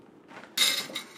FX - posar cubierto en plato